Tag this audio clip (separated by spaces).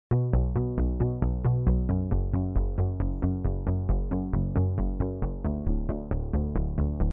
dance electronica trance acid synth